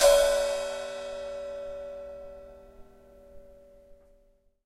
open hat 1
Individual percussive hits recorded live from my Tama Drum Kit